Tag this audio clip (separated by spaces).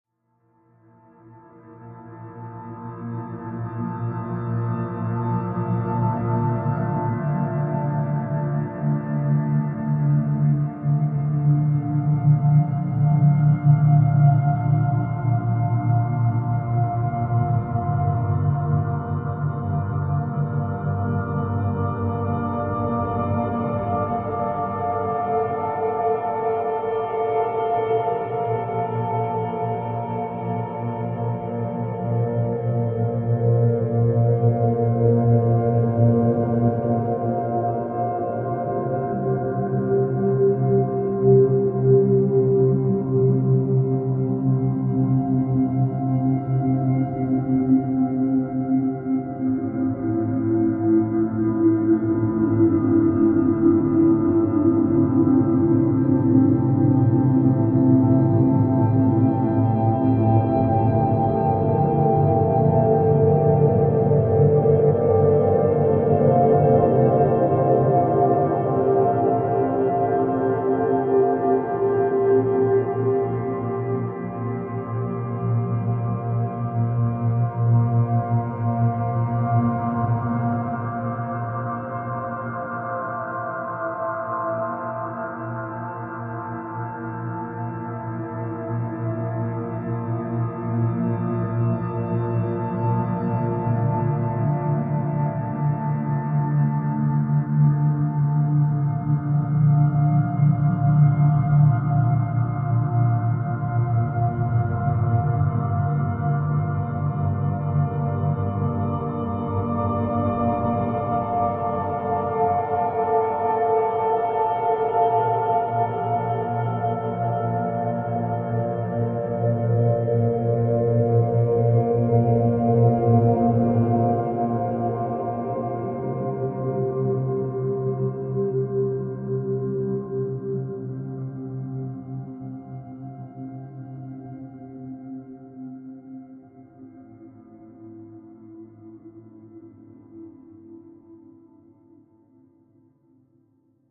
beat beep bop created dance electronic electronica loop loops Manipulated music Sample song sound track